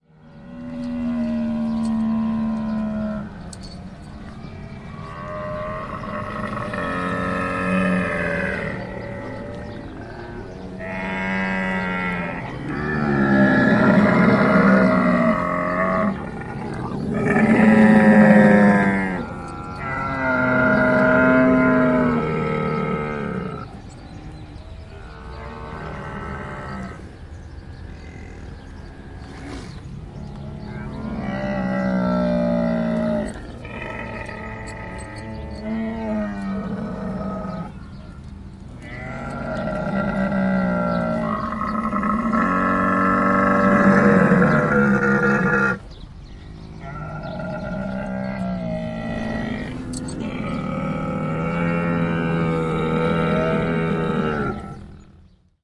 Camel Farm Bahrain (February 27th 2016) - 2 of 2
Short recording of a large group of male camels calling to a pack/herd of females in an opposite enclosure. Recorded at the Janabiya Royal Camel farm in Bahrain.
camel, field-recording, growl, nature